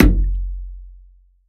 WATERKICK FOLEY - HARM 06
Bass drum made of layering the sound of finger-punching the water in bathtub and the wall of the bathtub, enhanced with harmonic sub-bass.
foley, percussion, kick